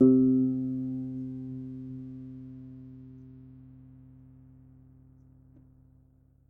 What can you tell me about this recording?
my mini guitar aria pepe